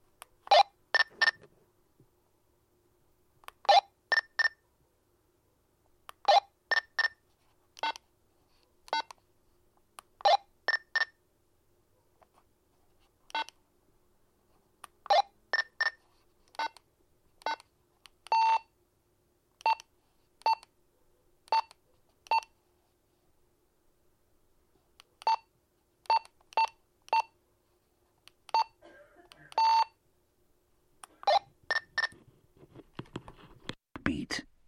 Recording of buttons of a Motorola 2-way radio with a low battery being pushed obnoxiously.
Recorded with a cheap condenser microphone into a DELL notebook with an external soundcard.